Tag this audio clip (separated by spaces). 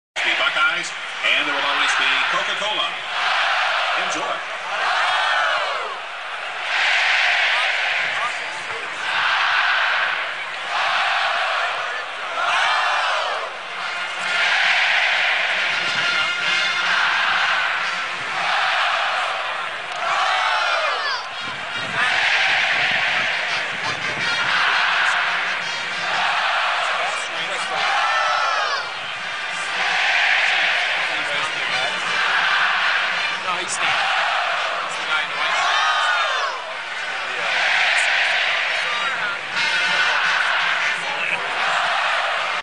chant crowd OHIO